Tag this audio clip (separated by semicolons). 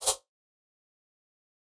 fx; beat; vicces; sfx; game; sound